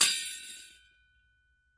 Bwana Kumala Ceng-Ceng 05
University of North Texas Gamelan Bwana Kumala Ceng-Ceng recording 5. Recorded in 2006.
bali, gamelan, percussion